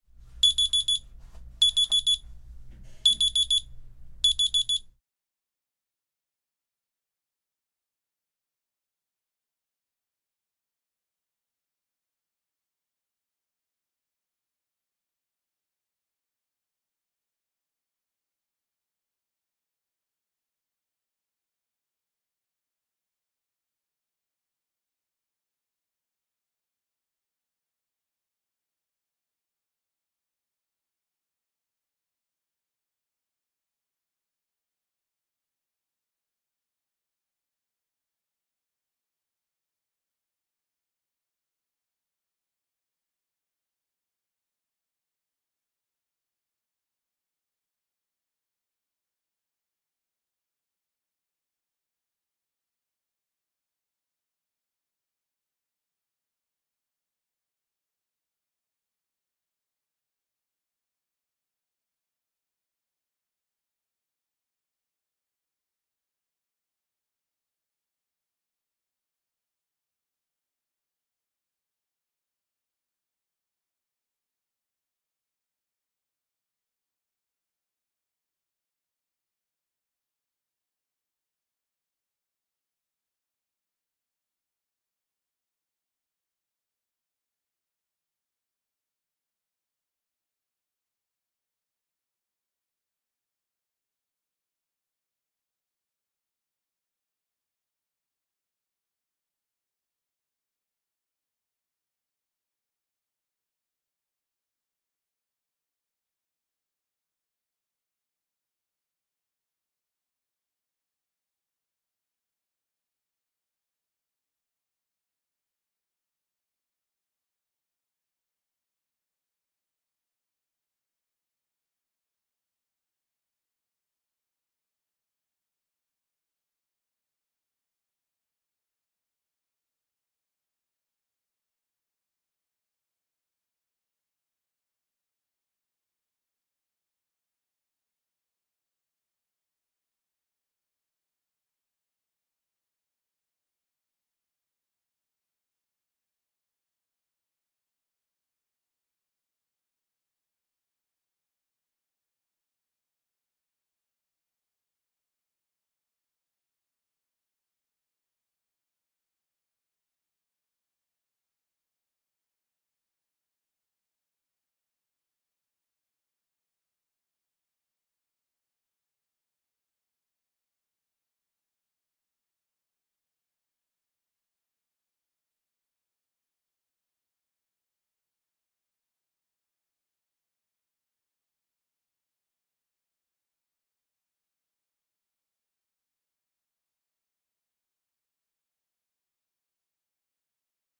01-Sonido del Despertador
Sonido de despertador digital
alarm, alert, Digital, ring, tone